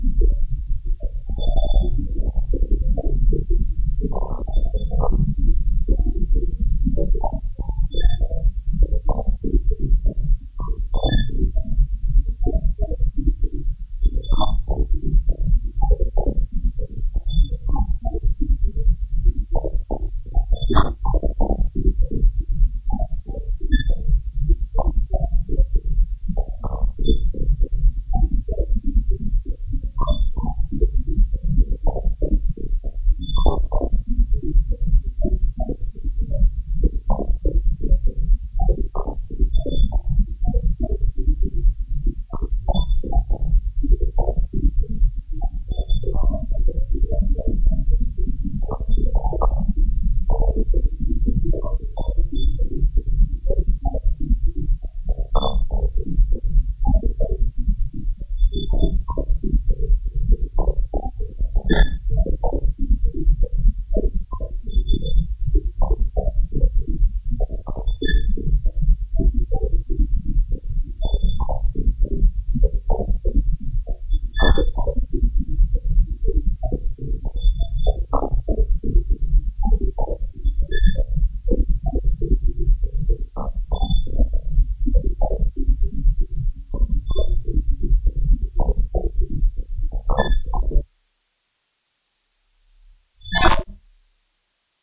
This sound was created by taking a file of solar irradiance data, and importing the data as a raw sound file using Audacity software. This is my first attempt to create sound from data; I hope to get better results later, but these may interest someone.
converted, data, irradiance, raw, solar, sun